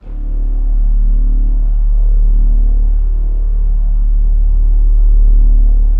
01-flutepad TMc
chorused stereo flute pad multisample in 4ths, aimee on flute, josh recording, tom looping / editing / mushing up with softsynth
d0, flute, pad, stereo, swirly